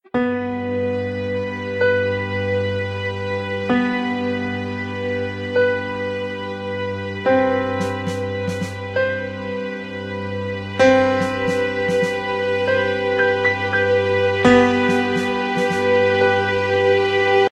Soldiers March (Cinematic)
I created this track in GarageBand using cinematic strings, subtle piano keys and an acoustic drum.
Feels like a soldiers last march into battle or some kind of realisation that this is the end. 18 seconds in length. Thanks.
Bulent Ozdemir
soldier, strings, movie, drama, cinema, dramatic